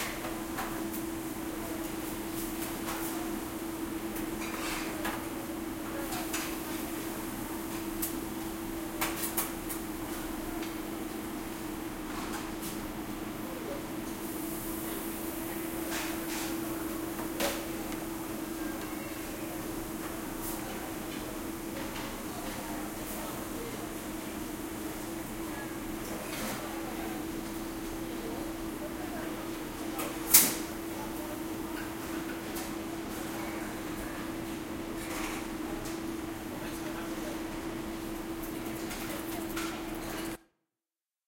Light kitchen ambiance with some sizzling and metal noises recorded in the East Village Commons dining hall at the University of Georgia using a Roland R-09.
ambience, light, cooking, kitchen, diner